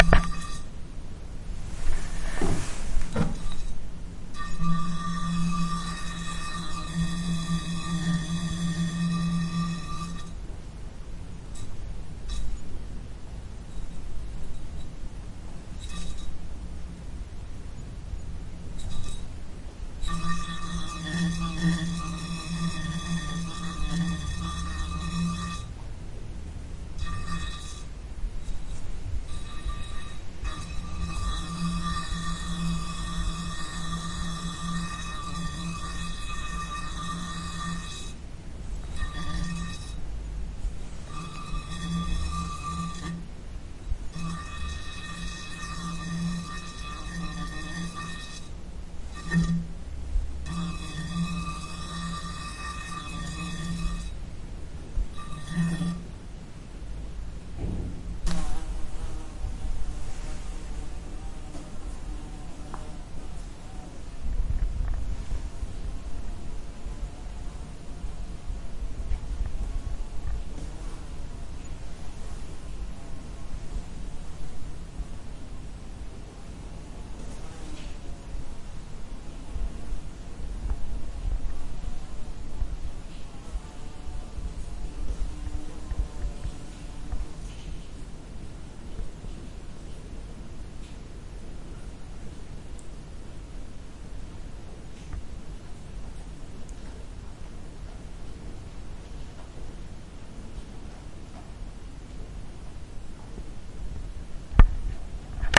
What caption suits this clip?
Bluebottle in bottle
A bluebottle trapped in a botte.
Recorded with Olympus LS11, stereo recording.
bluebottle, bottle, glass, insect